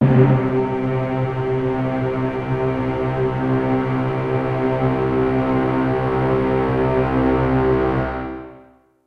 Spook Orchestra A2
Spook Orchestra [Instrument]
Instrument, Orchestra, Spook